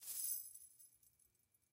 chains 10swipe
Chain SFX recorded on AT4033a microphone.
clattering, chains, metal